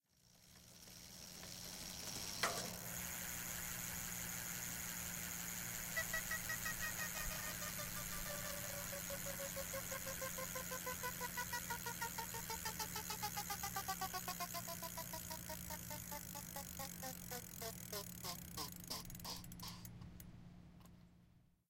Bike Tire Strobe Stop
Stopping a spinning bike tire with an introduced inanimate object (not the hand). Recorded on Stanford Campus, Saturday 9/5/09.